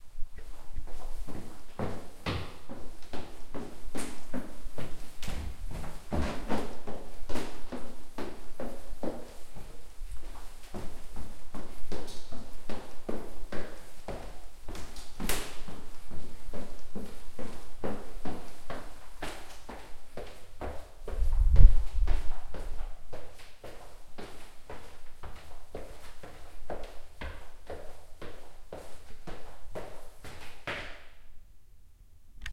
Walking up stairs
Walking up the stairs of a student apartment block, fairly quickly but not running. Bournemouth, UK
apartment, fast, quick, stairs, up, walk, walking